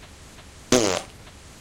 fart poot gas flatulence